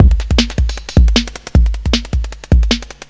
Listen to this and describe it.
kuzman909 309loopcool rwrk
i just speed up the beat, edited, filtered, compressed and gentle-distorted, it can be an useful beat for a drum and bass track.
beat, breakbeat, dnb, drumloop, electro, filter, loop, processing, remix